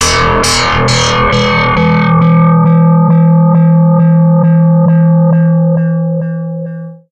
Hot girl banging an empty sardine can with a silver spoon processed thru MS20.